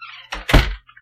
Close Door
Closed my door and amplified it by double and removed the background ambience
used a iphone 6 to record it
Creak, Samuel